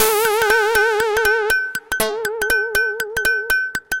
FunkySynth A-Style3-rhythym&riff 120bpm
This sound consist in a rhythmic sequence plus a nice funky lead synth.
2 bar, 120 bpm
The sound is part of pack containing the most funky patches stored during a sessions with the new virtual synthesizer FM8 from Native Instruments.
abstract, electro, funk, funky, lead, loop, percussive, rhythym, riff, sequence, soundesign, synth, synthesizer